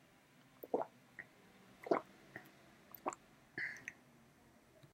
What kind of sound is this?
To swallow water
agua, glup, swallow, swallowing, tragar, water